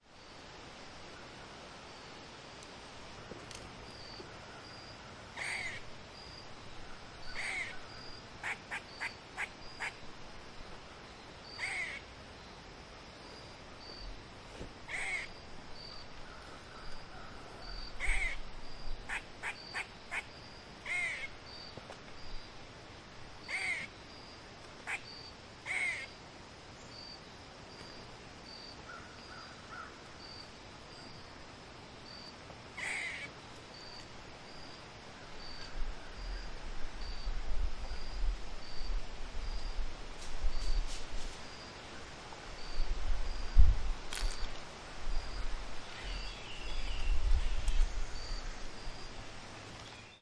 SquirrelFussingOct13th2015
An almost comical recording of a big, reddish Fox squirrel fussing at me. This is a true stereo Recording made with Marantz PMD661 field recorder and two
Sennheiser ME66 microphones. Enjoy
field-recording, animals, squirrel, forest, nature, fussing